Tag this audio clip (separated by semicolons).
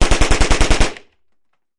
gun; gunshot; shot; UZI; weapon